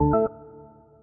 error sound
Use this sound in your game to indicate an error or loss.
game-over, wrong, loss, fail, error, mistake